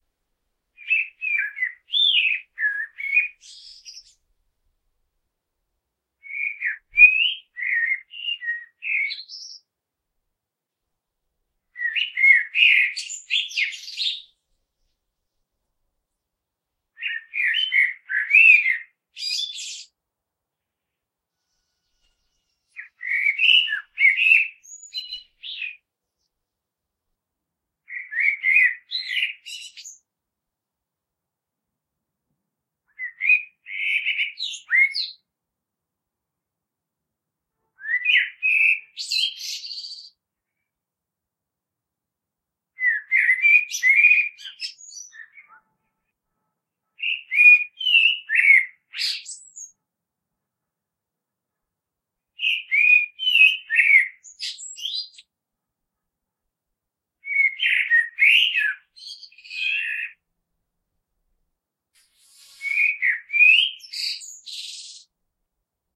Common Blackbird 13 seq
Blackbird spring song, H4 recording, denoising with audacity.
nature, birdsong, H4, blackbird